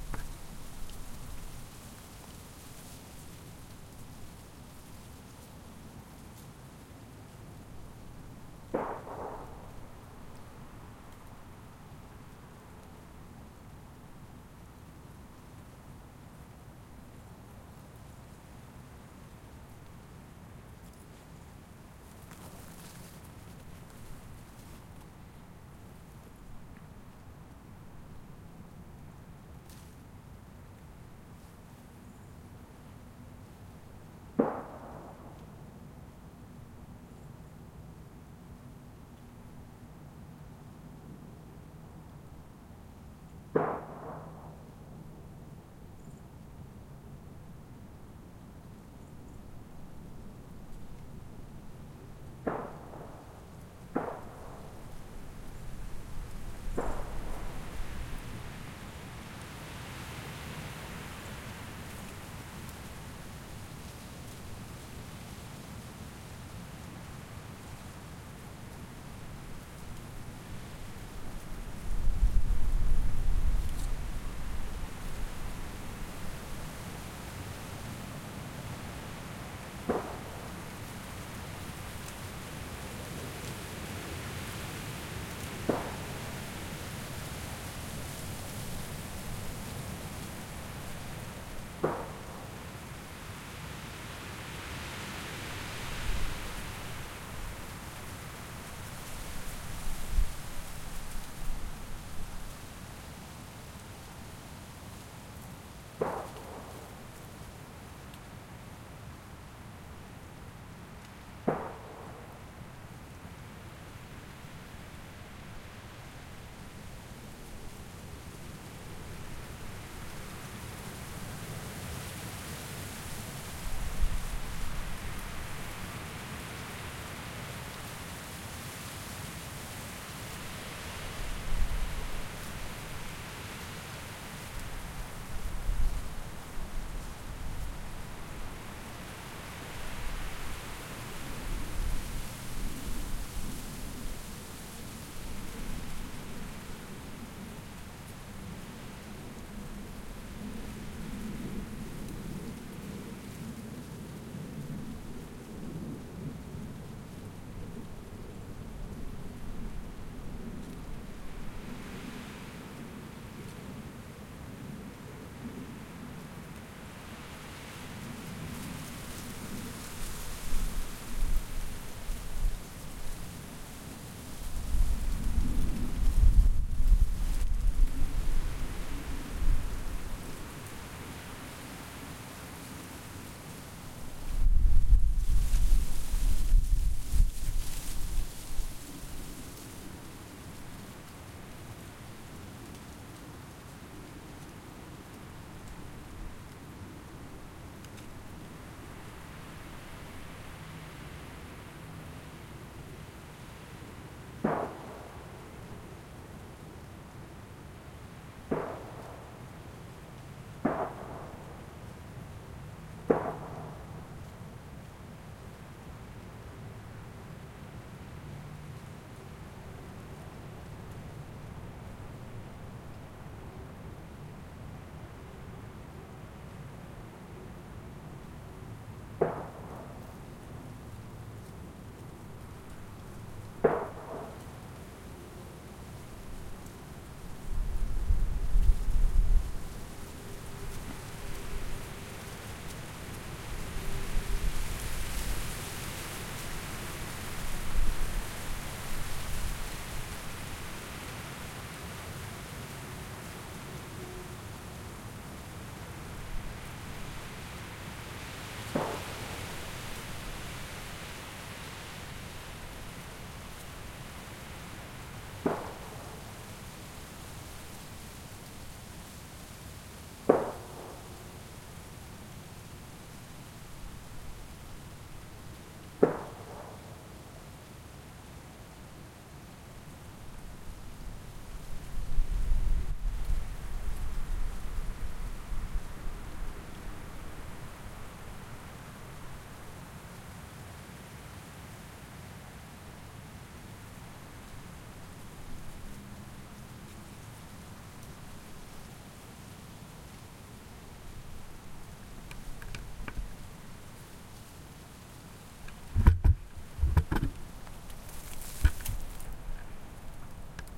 Wind-Gusts-late-autumn-distant-gunshots-traffic-air-traffic
Field recording in late autumn, New England, during 20+ MPH wind gusts. Not-so-distant gunshots. Distant traffic. Distant aircraft. Pine forest.
forest, autumn, gunshot, traffic, gunshots, gust, gusting, gusts, pine, distant, wind, field-recording